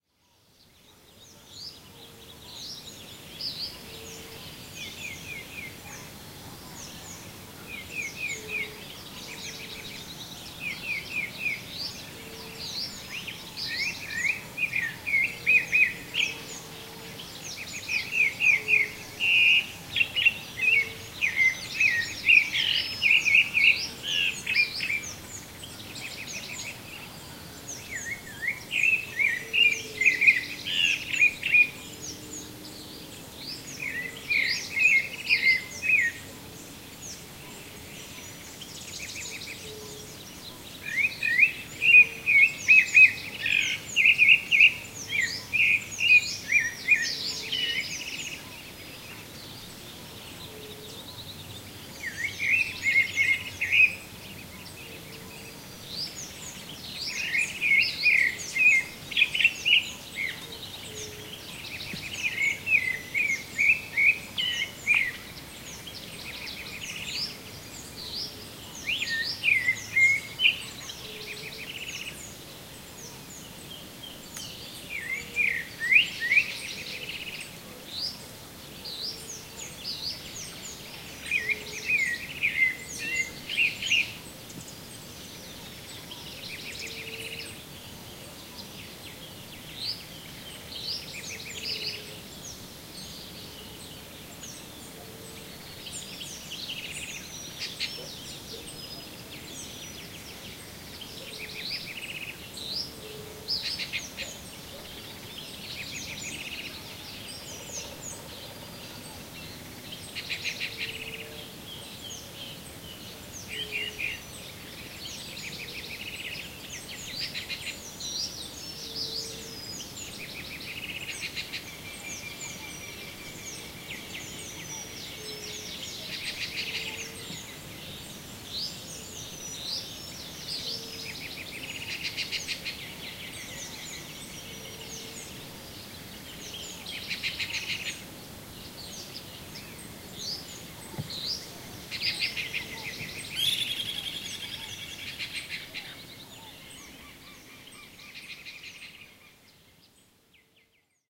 2014 10 04 Fazenda Cana Verde morning birds 8
Early morning before sunrise on a big farm, so-called fazenda, in the Sao Paulo hinterland, near Campinas, Brazil. Song and calls of birds near the residential area of the farm, by a small pond. Waterfall noise in the background.